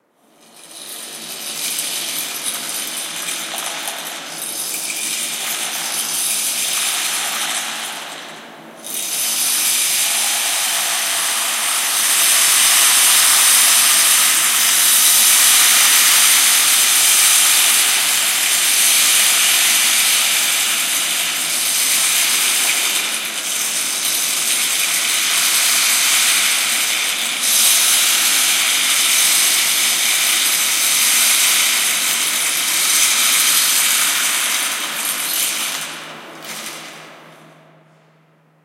Sound created for the Earth+Wind+Fire+Water contest.
Using a rainstick with a length that is about the time for a drop to fall from the cloud to the ground.
This sound was recorded in Italy using a portable minidisc.
Then it was processed with a natural reverb in a 11 high X 7 diameter tank of reinforced concrete in Oberhausen, Germany thanks to an on-line and free "non virtual reverb".
It was then mastered in pro-tools.
Water = an Italian rainstick in a German tank.
competition, contest